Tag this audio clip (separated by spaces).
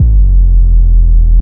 kick drum distorted bass tom 808 one-shot